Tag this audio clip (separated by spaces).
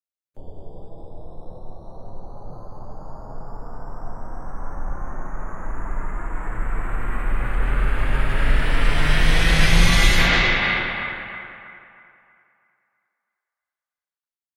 impact sound-effekt delay uplift sweep reversed FX